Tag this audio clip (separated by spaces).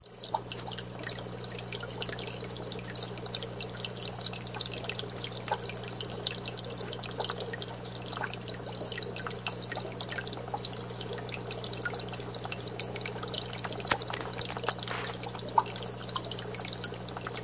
hum ambient buzz